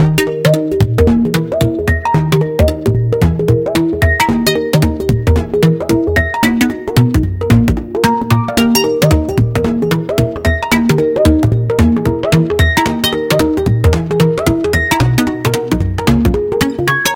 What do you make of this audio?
hi-tech hoedown